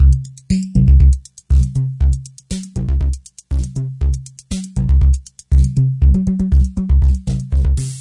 MR Phasy 02
Lofi recording, analog Yamaha MR10 Drum Machine raw beat. 80's classic drum machine.
Drum-Machine, Classic, Analog, Lofi, Yamaha-MR10, Phaser